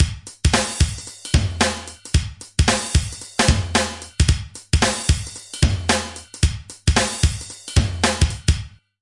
Boss - DR 550 MKII - Bank A Beat 1
Boss - DR 550 MKII Samples Demo RAW (no effect)
We recorded each sounds to make the best soundbank of this drum machine.
Boss, DR550, Drum-machine